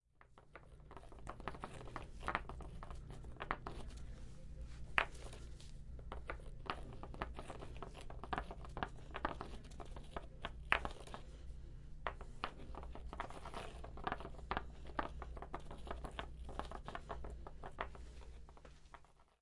21-Caida rocas
Rocas siendo frotadas entre si